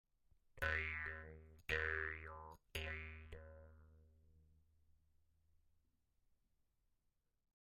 A recording of a jaws harp whilst the player says take me to your leader. Recorded with a behringer C2 pencil condenser into an m-audio projectmix i/o interface. Very little processing, just topped and tailed.